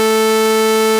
An interesting sounding synth sound created with multiple types of waves. Can be looped if necessary. Root key is "A".